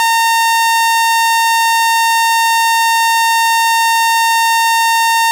Saw wave @ 880hz from Roland Modular synth: System 100M.